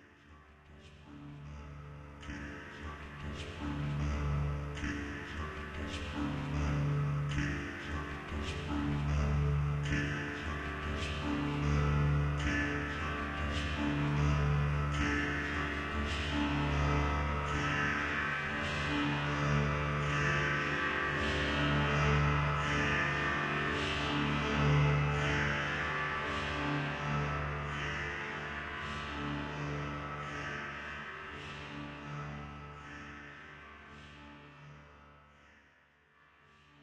"Kings and desperate men". Part of my "Death be not proud" sample pack which uses words from the poem by John Donne to explore the boundaries between words, music, and rhythm. Read by Peter Yearsley of Librivox.
ambience, electro, electronic, music, poetry, processed, synth, voice